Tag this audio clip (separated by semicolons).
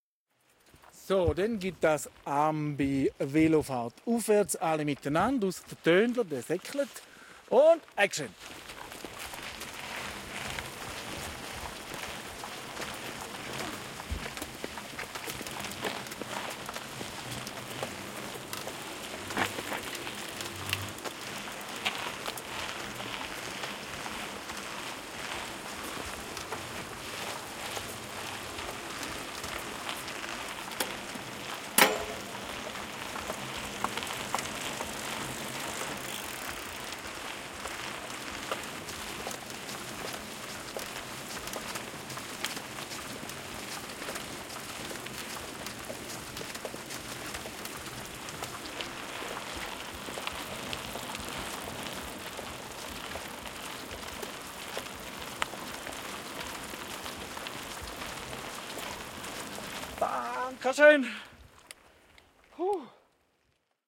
pedaling; bike; fieldrecording; MS